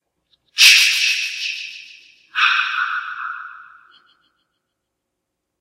Jason sound 2 "Chi-ChiChi-Ah-Ah-Ah"
This is the more common sound heard when Jason appears in the Friday the 13th movies, this was recorded with Audacity and I used an echo effect on it.